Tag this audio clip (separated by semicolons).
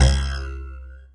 musical; jew-harp; trump; tech; effect; ambient; pcb